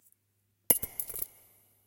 Shell Casing 7
Various Gun effects I created using:
different Snare drums and floor toms
Light Switch for trigger click
throwing coins into a bowl recorded with a contact mic for shell casings
guns, shell, casing